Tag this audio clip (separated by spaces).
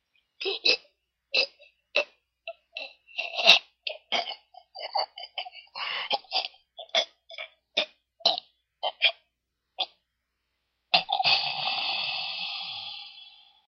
choking man strangulation